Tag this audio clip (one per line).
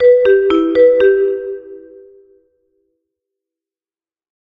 bus
transportation
stations
busses
station